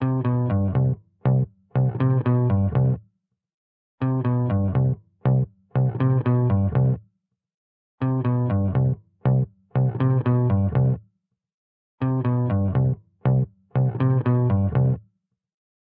Bass loops 016 short loop 120 bpm
120, 120bpm, bass, beat, bpm, dance, drum, drum-loop, drums, funky, groove, groovy, hip, hop, loop, loops, onlybass, percs, rhythm